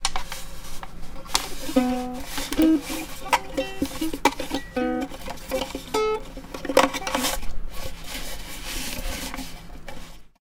Shuffling with a ukulele
Shuffling/touching a ukulele
ukulele, fingers, nails, foley, shuffle, shuffling